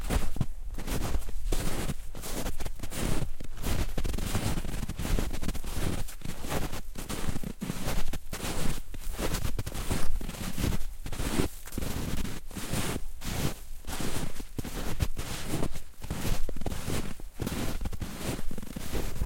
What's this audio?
Footsteps in soft snow. Recorded with Zoom H4.